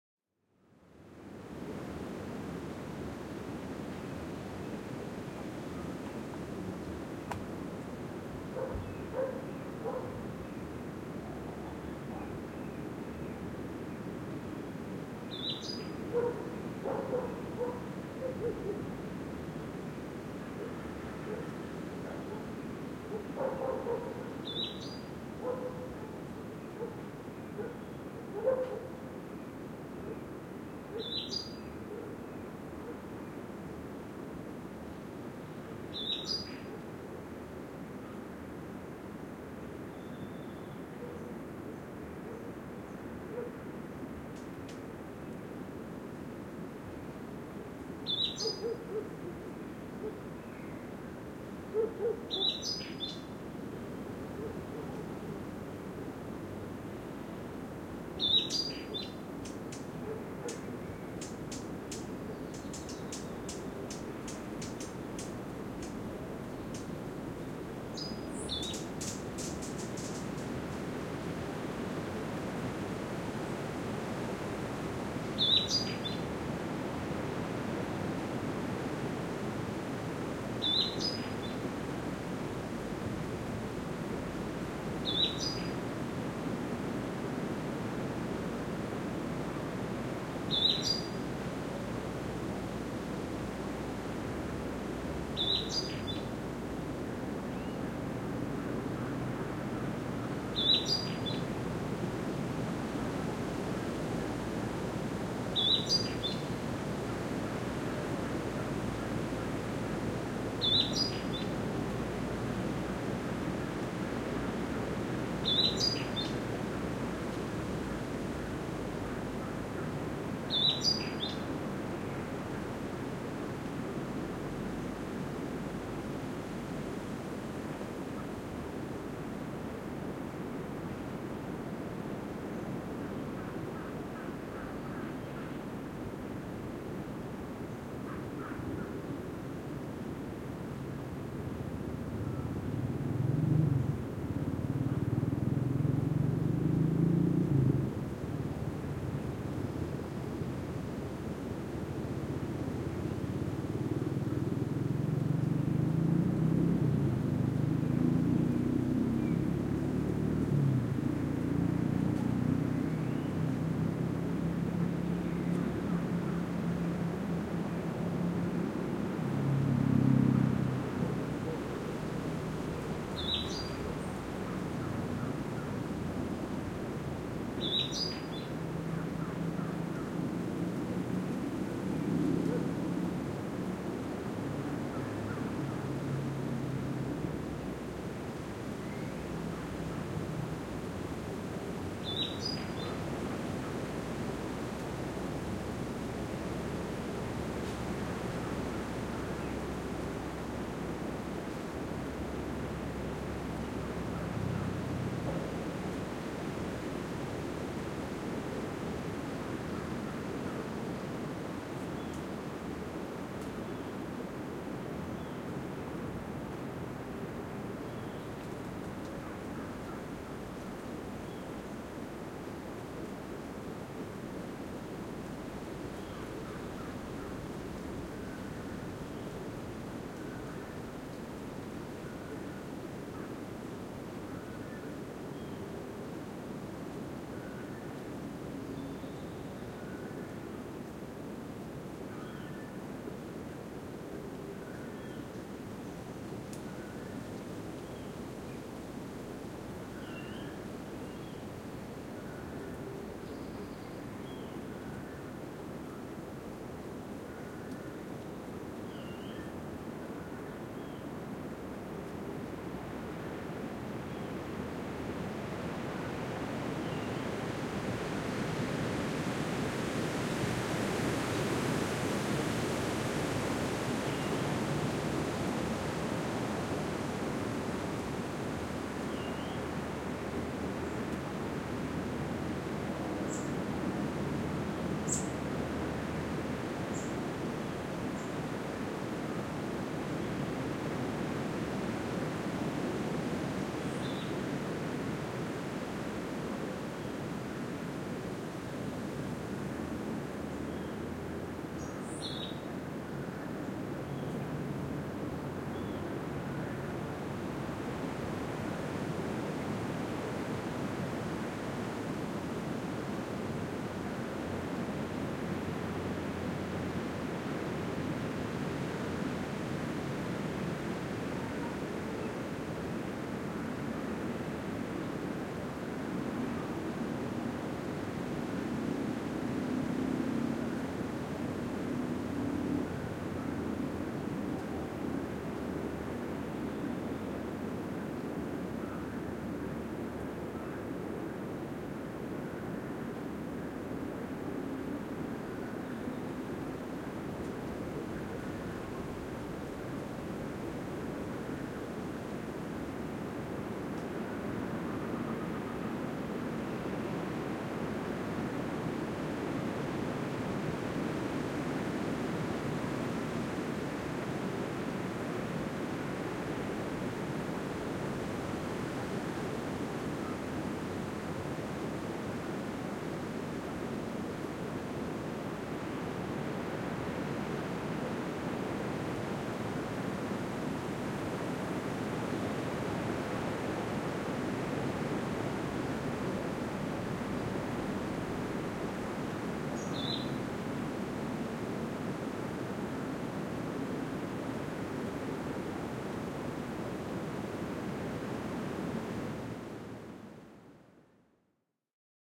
cr dry forest 02
An ambient field recording from a lower elevation dry forest near Monteverde Costa Rica.
Recorded with a pair of AT4021 mics into a modified Marantz PMD661 and edited with Reason.
tropical, ambient, birds, nature, birdsong, wind, forest, outside, field-recording, costa-rica, animals